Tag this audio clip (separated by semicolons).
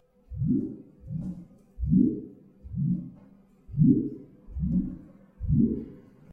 cartoon; wiggle; funny; fun; walk; legs; silly; foley; wobble; wobbles